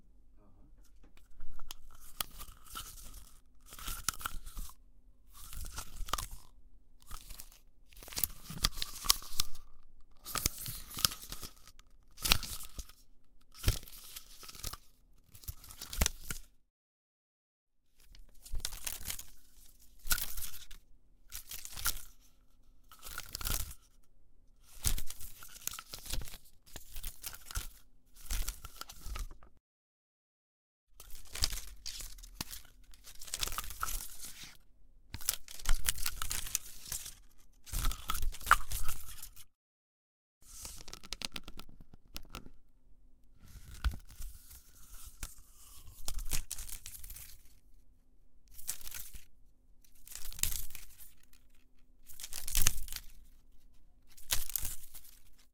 film, tape
Negative Film recorded with a RODE NT1000 microphone.
tape, camera, recording, studio, photo, reel, roll, film